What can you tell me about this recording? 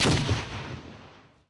m67 fragmentation grenade explosion 4
Specific details can be red in the metadata of the file.
agression, army, attack, explosion, fight, granade, granate, grenade, military, war